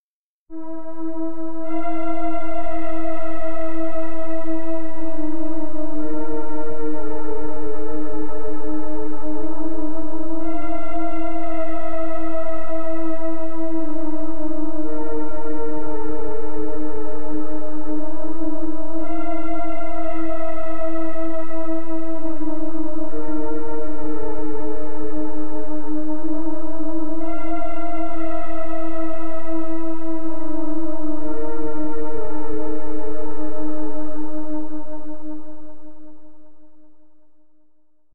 cine pad1
ambience, ambient, atmosphere, background, background-sound, cinematic, dark, deep, drama, dramatic, drone, film, hollywood, horror, mood, movie, music, pad, scary, sci-fi, soundscape, space, spooky, suspense, thiller, thrill, trailer